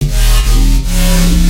Widespread synth sound
dubstep, reverb, room, synth, wide